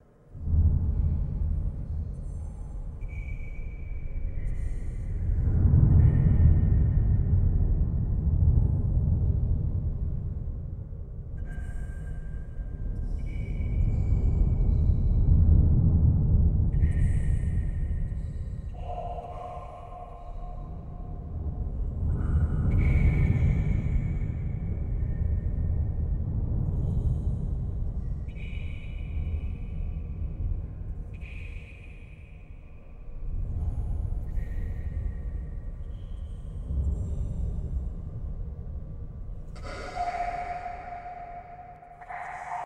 I recorded a glass of water and my self blowing into a samson condenser mic. Added filters give us...